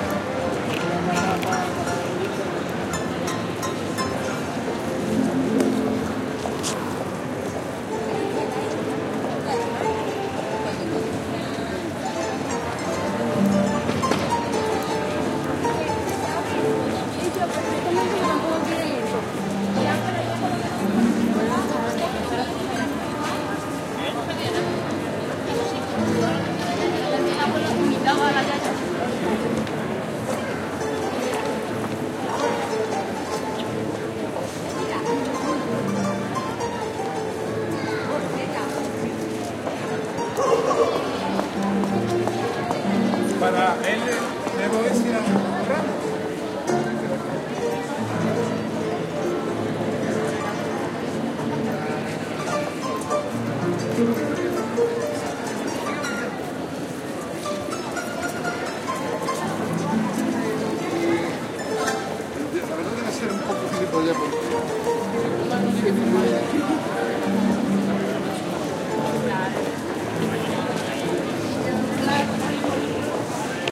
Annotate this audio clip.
20100122.street.ambiance.harp
street ambience, with a street musician playing the harp, and voices talking in Spanish. Olympus LS10 recorder internal mics
Recorded in Avenida de la Constitucion, Seville, Spain